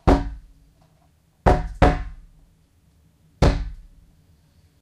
Banging the outside of a large plastic barrier that is usually filled with water while hanging the DS-40 with it's strap down through a 2 inch port and recording from the interior. Try that with a boom mic...